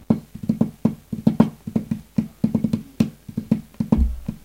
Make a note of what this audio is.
drumming fingers on table
fingers, drumming